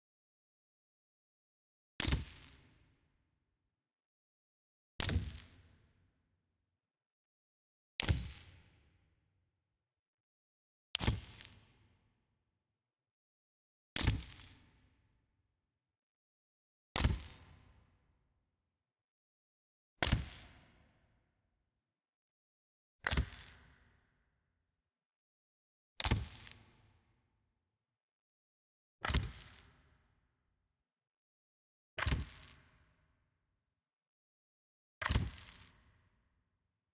These are series of various flash bulb pop sounds designed by me for the war-themed short film project.
I've decided to create those myself from scratch, because I could not find any proper recordings of those rare vintage flash bulb which were closed lamps that contained a magnesium filament along with oxygen gas that would be ignited and create a significant pop followed by short crackle. Examples and also a reference for these I took from the opening scene of "Watchmen" (film, 2009) directed by Zack Snyder.
Materials from Soundly Pro library.